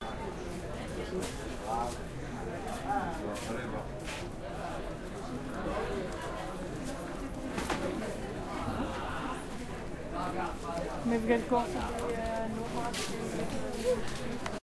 Marrakesh Street Ambience
Street ambience in Marrakesh
general-noise, morocco, ambiance, marrakesh, ambient, city, ambience, field-recording, marrakech, soundscape